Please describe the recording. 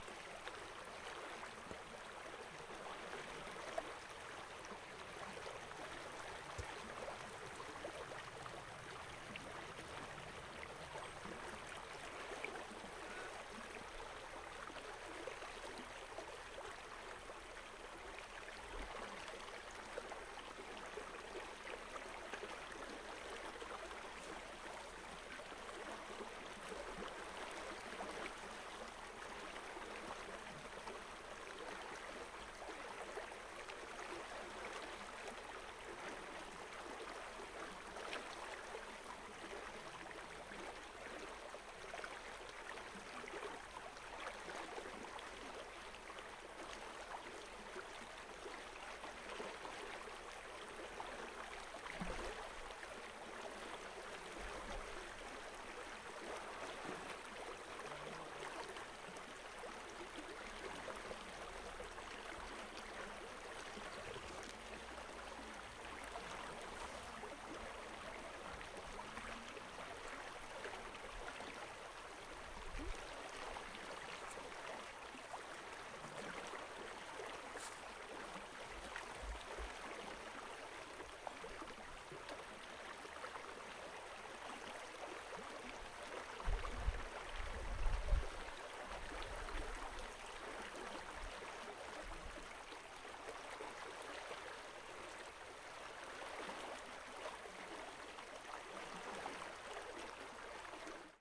ruisseau FournolsHC 4
water streams recordings
streams, water, recordings